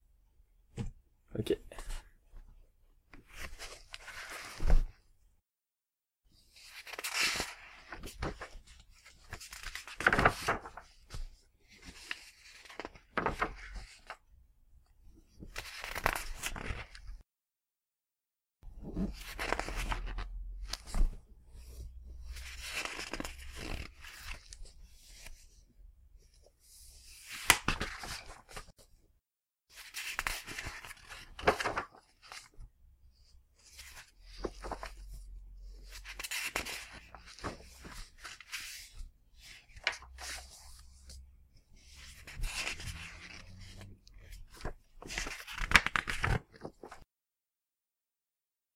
Turning paper page 1
Turning paper pages.
{"fr":"Tourner des pages en papier 1","desc":"Manipulation de pages en papier.","tags":"papier page feuille feuillet"}